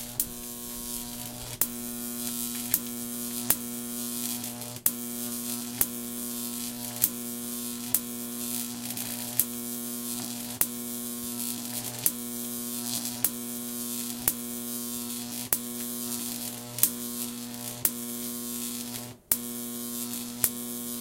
Jacob's ladder (electricity)
Recorded with a Zoom H2n in Washington state. Perfect for science fiction sfx.